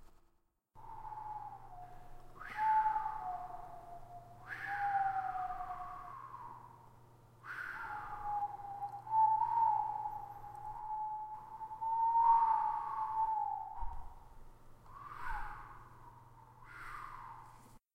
night wind
The sound of some wind ambience.
Created by recording and layering multiple recordings of myself whistling and blowing in different patterns. Added an echo and reverb.
ambience, forest, nature, night, wind